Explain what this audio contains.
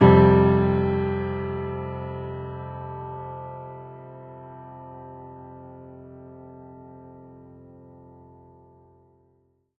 Usyd Piano Chords 03
Assorted chord oneshots played on a piano that I found at the University Of Sydney back in 2014.
Sorry but I do not remember the chords and I am not musical enough to figure them out for the file names, but they are most likely all played on the white keys.